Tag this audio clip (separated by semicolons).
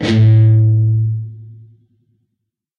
distorted; distorted-guitar; distortion; guitar; guitar-notes; single; single-notes; strings